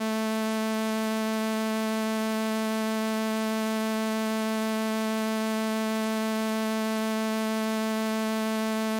Transistor Organ Violin - A3
Sample of an old combo organ set to its "Violin" setting.
Recorded with a DI-Box and a RME Babyface using Cubase.
Have fun!
electric-organ, transistor-organ, analogue, analog, raw, vintage, vibrato, combo-organ, sample, string-emulation, electronic-organ